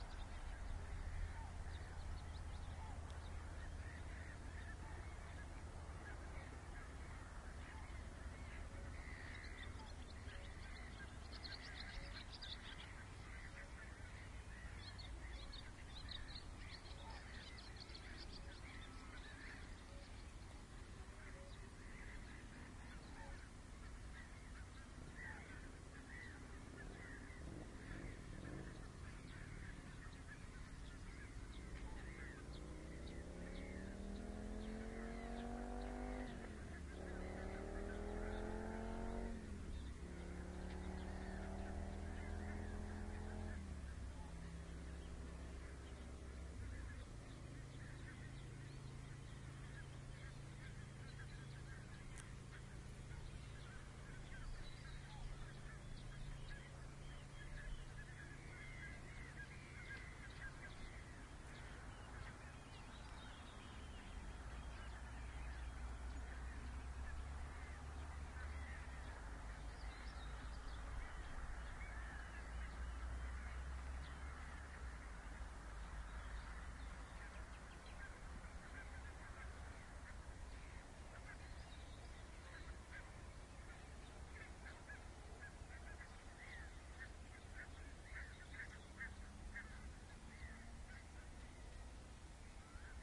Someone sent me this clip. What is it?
Near Esbjerg
A short binaural recording near the lake at the Sneum
Sluse bird sanctuary. The area is one of the most important wetlands
for migrant and breeding birds, not that you hear much of them on this
recording. Soundman OKM II into iriver ihp-120.
A picture of the location:
athmosphere, binaural, denmark, field-recording, flickr, sneum, swallows